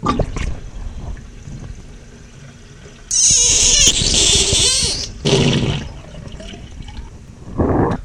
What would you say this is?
Bathroom Sink Drain V2
Stereo recording of me pulling the stopper from the drain, 4 seconds of the sound being drained away, when a whirlpool vortex shows up for about a second (which is very squeally and high-pitched), then followed right after by a loud, angry gurgling sound, then it ends with a calm, lathering sound of the last little bit of water being sucked in the drain. *FIXED 4/5 of the static in this release*